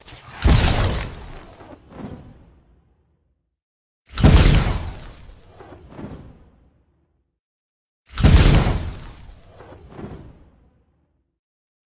LAUNCH (Futuristic)
A small, futuristic "launching" sound. Imagine a short-range jetpack jump or the firing of a small, sci-fi rocket launcher.
launch, jetpack, futuristic, rocket